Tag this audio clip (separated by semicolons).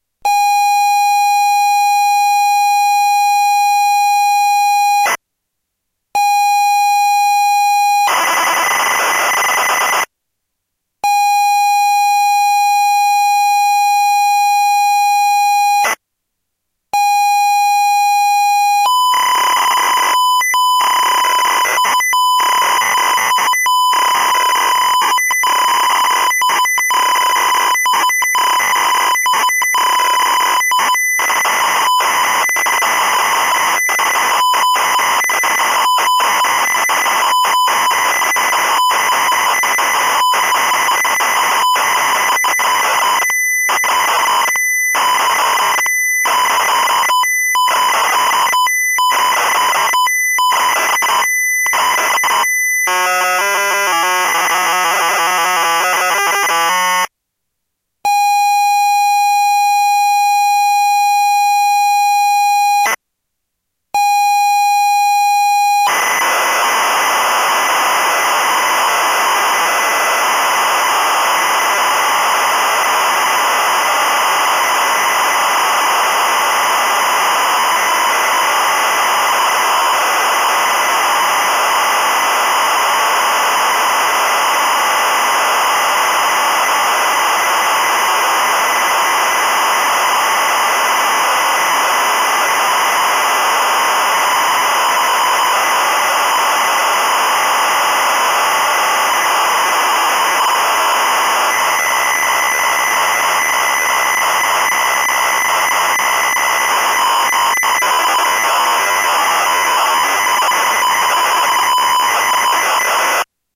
bleep; computer; digital; edge; electronic; headers; loading; noise; reading; spectrum; tape; zx